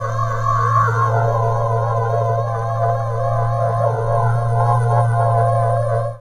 This sample was created in Ableton Live 7 using my own voice put through a series of VST plug-ins. I cannot be precise on the exact plug-ins used and their order or settings (sorry, did not make a note at the time!). For this sample, I ran my voice through two different pitch-shifting VST plug-ins in parallel and then into a phaser and vocoder plug-ins.
alien, effects, vocoder